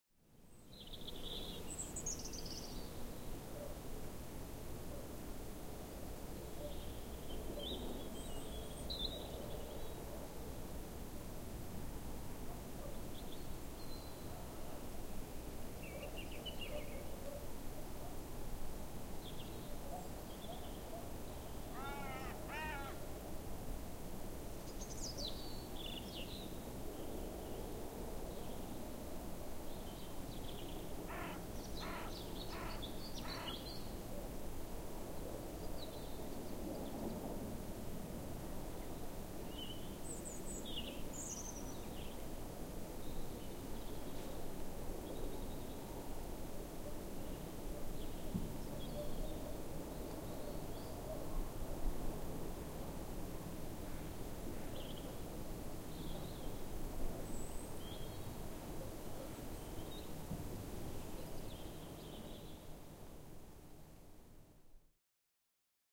A spring day in late March 2008 at Skipwith Common, Yorkshire, England. Several birds are heard and general woodland sounds including the breeze in the trees.